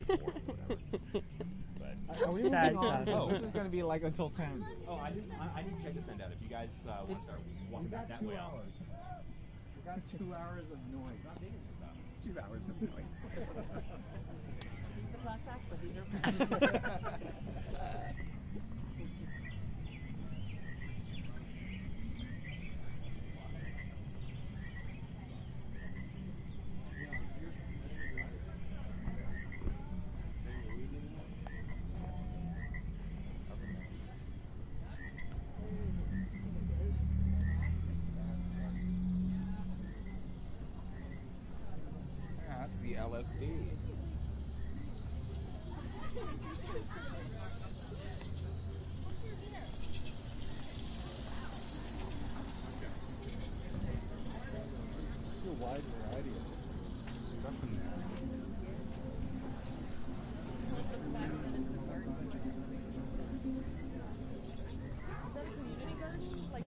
This is a part of a set of 17 recordings that document SoundWalk 2007, an Audio Art Installation in Long Beach, California. Part of the beauty of the SoundWalk was how the sounds from the pieces merged with the sounds of the city: chatter, traffic, etc. This section of the recording features pieces by: Elonda Billera